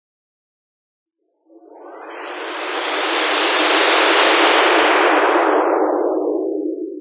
Created with coagula from original and manipulated bmp files.
image, synth, space